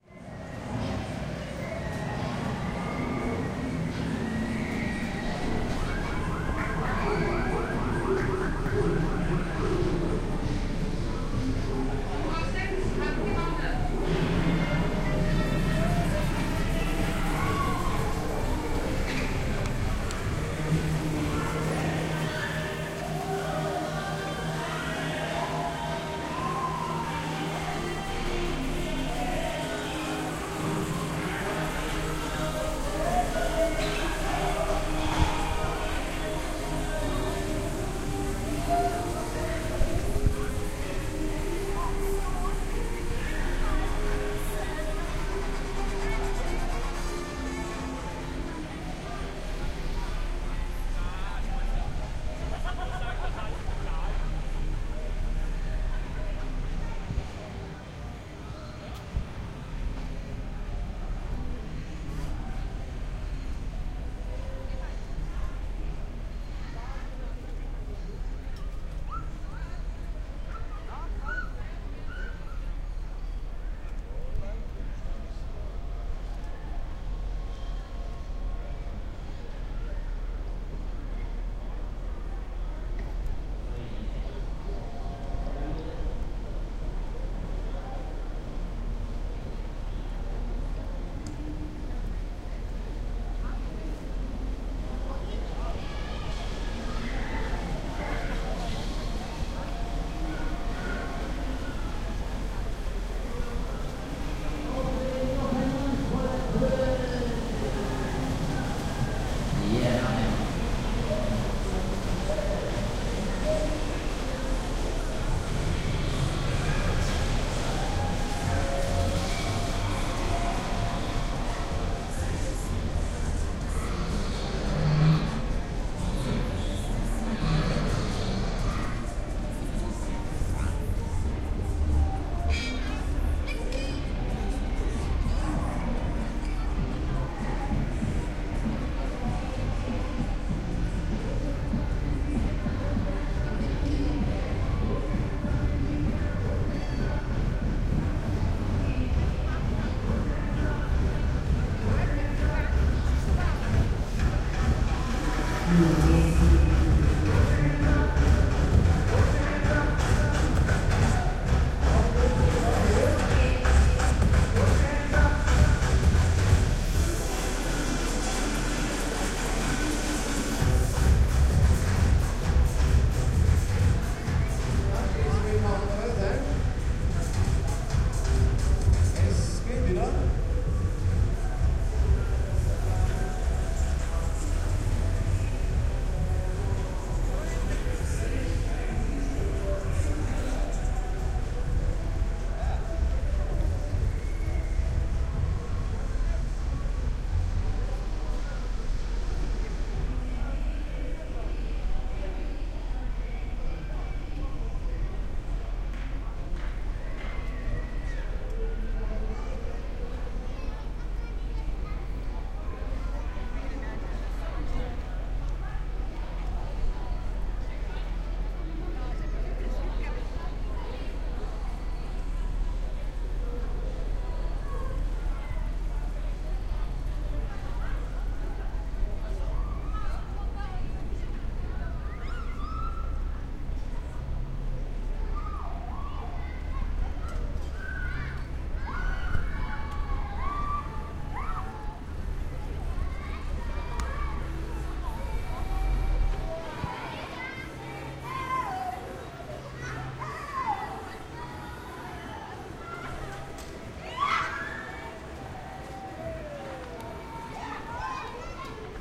Recordings from "Prater" in vienna.